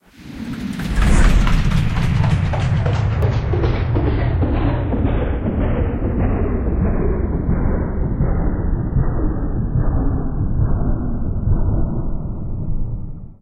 An attempt to make a going into slow motion type sound.
motion, power-down, power-down-slow, request, slower, wind-down